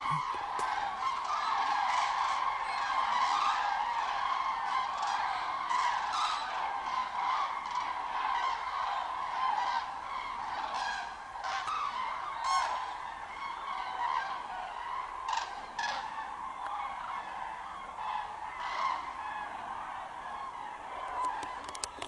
Flying Cranes
Cranes flying above a forest to their resting place on the Baltic Sea coast. Recorded while I was filming in a forest.
birds, cranes, field-recording, baltic